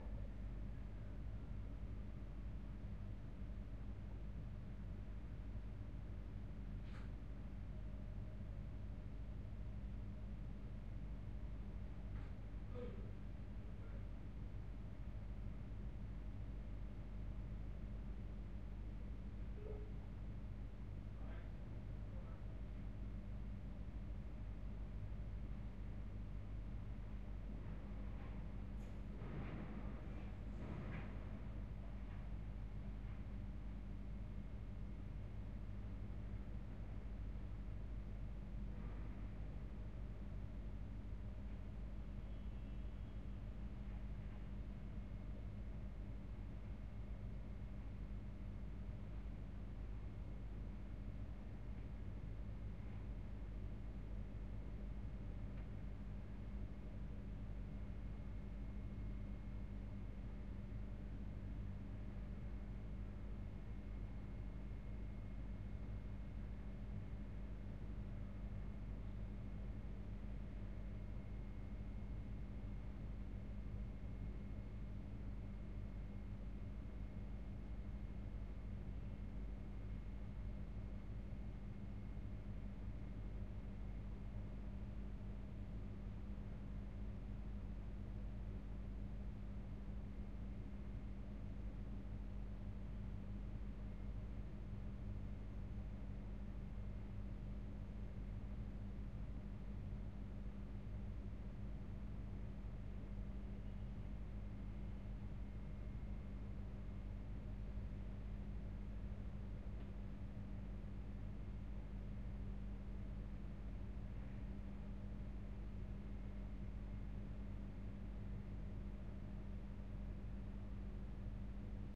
Room Tone Office Industrial Ambience 05
Indoors, Industrial, Tone, Ambience, Office, Room